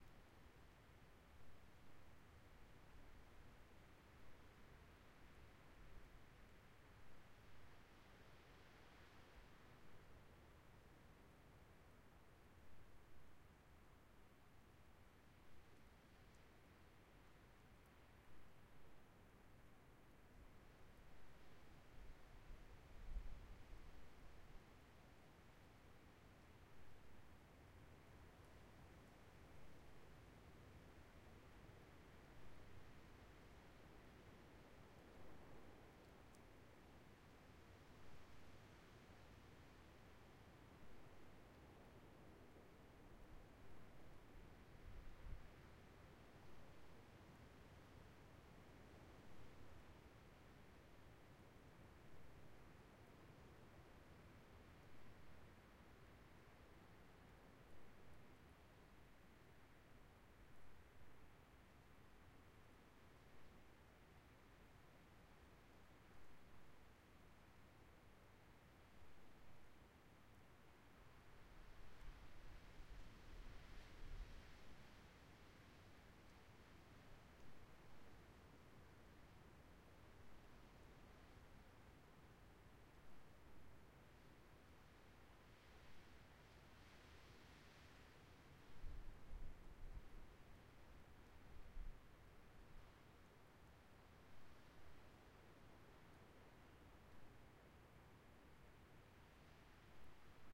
HUDSON spring melt and gusty wind 2B
back pair of 4 channel recording on H2. Front pair has matching name.
4-channel, exterior-ambience, gusts, quebec, spring, wind